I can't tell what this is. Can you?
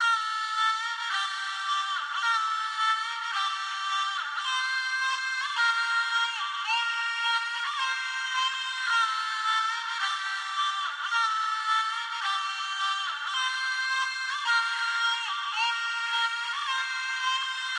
Distorted Electric Sexy Female Vocal (Weaknd) - Gmin - 108bpm
spooky, voice, emotional, dark, eerie, female, vox, rnb, hiphop, rap, love, trap, vocal, woman, hip-hop, sexy, girl, cinematic, serious, scary